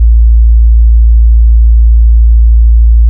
Real Subbass
This was a No23 record from a little freeware program. A real sub.
C, Deep, Free, Subbass